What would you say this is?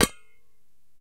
vacuum flask - placing cap 02
Placing the plastic cap on a metal vacuum flask.
metal, hollow, plastic, container, vacuum-flask